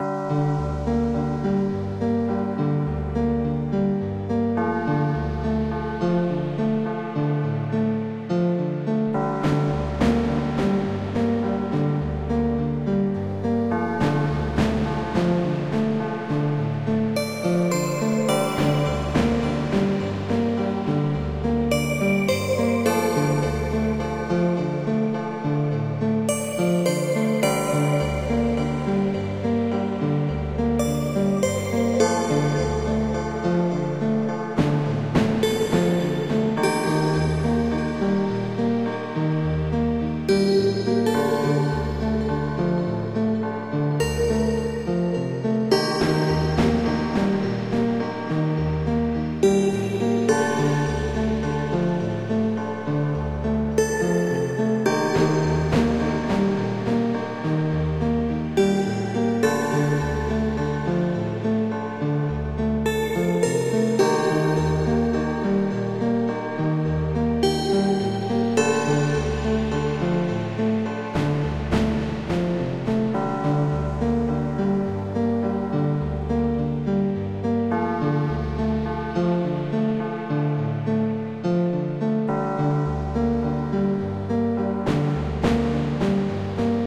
ambience bells.(Globallevel)
Synth:Ableton,Silenth1
abstract, beat, digital, effect, electronic, loop, noise, original, pop, pop-synth, soundeffect, tecno, track